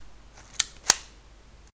Handgun reload
Reload a 6,6mm Handguin
fire, gun, handgun, reload, weapon